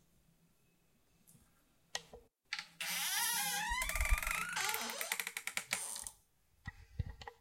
Noisy Door
This is the sound of my wife's old closet door. Recorded with a Zoom H4n St
domestic, door, noise, old, room